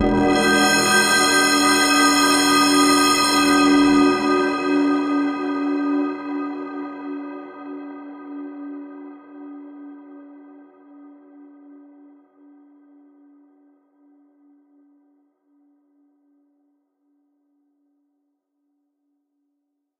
Scary Metallic Whistle
Spook-inducing whistle. Makes me think of a haunted train. I made this accidentally by adding Logic Pro X's 'EVOC 20 Filterbank' effect to a custom Dubstep wobble bass and turning the resonance all the way up.